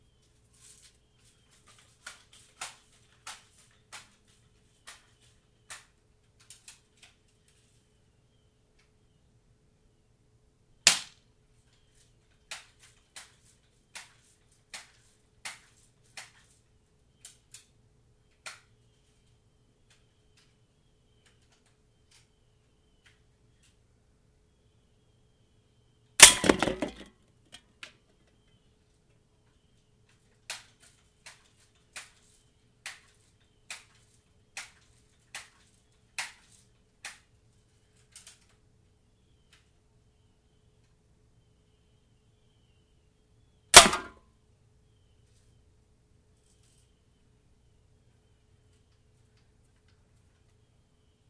Shooting two cans with the bb gun.
aluminum, bb, can, gun, impact, percussion, plink, tin